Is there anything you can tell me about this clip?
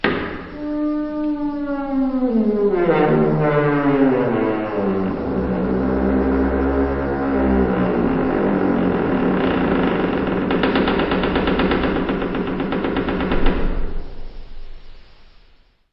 Door creaking 02 2 with Reverb
closing, creak, squeak, squeaking, squeaky, rusty, slam, opening, door, hinges, lock, creaking, shut, clunk, wooden, creaky, close, slamming, hinge, handle, wood, open